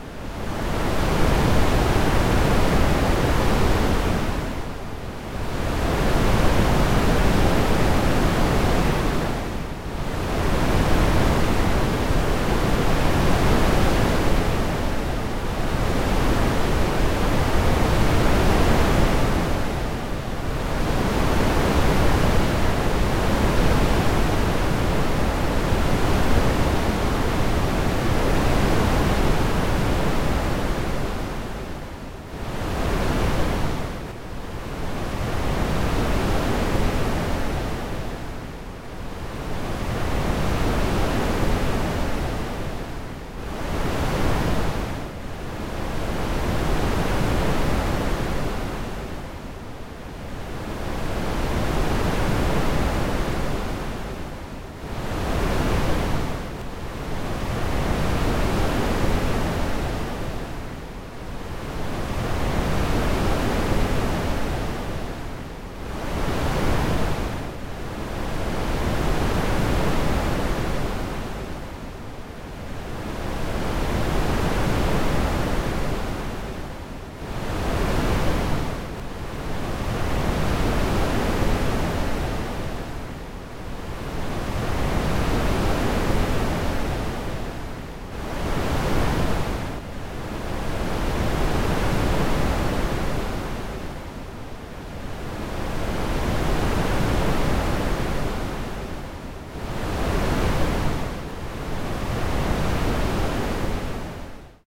Agua olas audacity
Sound generated by the audacity software imitating the waves of the sea
scl-upf13, surf, water, audacity, waves